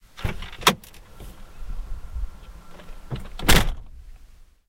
Car door slam
Car door open and closes in the rain